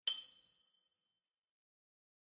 Nail Drop 002
Iron Nail dropped on Metal Stage weights... Earthworks Mic... Eq/Comp/Reverb
Foley, metal